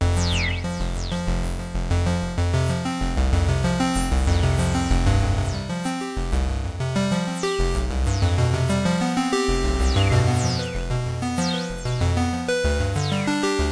Micron Nintendo 1
Alesis Micron Stuff, The Hi Tones are Kewl.